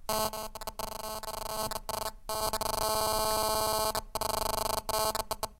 Cell Phone Interference
Interference from a cell phone.
Recorded with a Zoom H1.